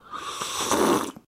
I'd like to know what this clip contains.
coffee slurp 3
slurping a coffee number 3
coffee, tea, espresso, sucking, slurp, sipping, cup, drinking, slurping, slurps